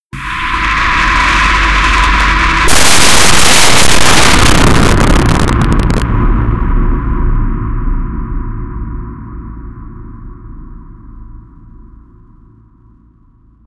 I really don't know what this is. :) Transformed an old recording in Audacity.